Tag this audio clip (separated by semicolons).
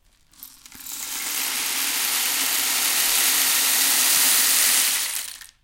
chile; chilean; ghana; ghanaian; instrument; percussion; peru; peruvian; rain; rainstick; rattle; shaker; stick; storm; weather